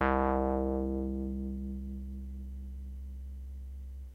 Ow Bass
wobble sweep sound created on my Roland Juno-106
bass, hit, sub, wobble